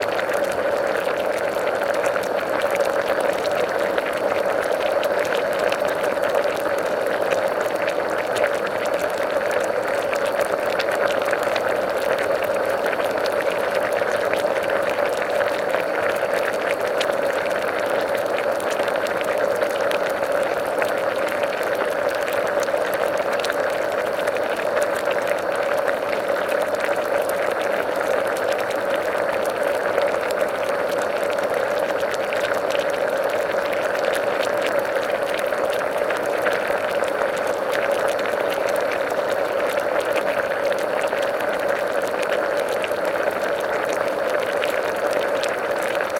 Heater sausages with bubbling water
heater, hotdog